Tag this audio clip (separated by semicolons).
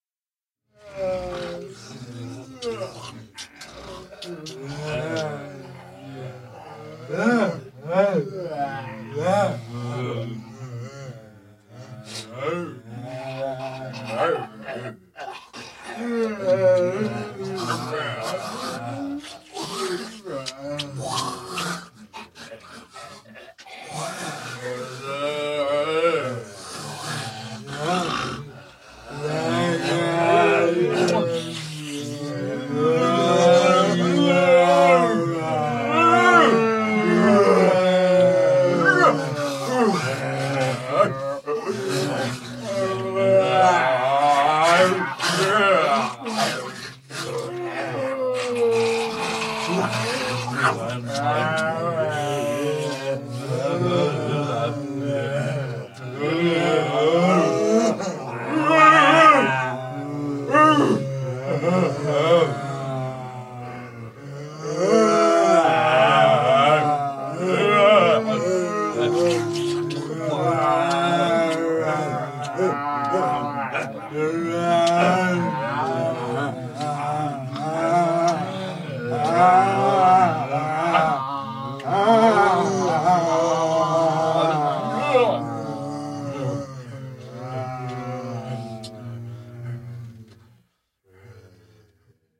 horror; weird; scary; zombies; creepy; terror; suspense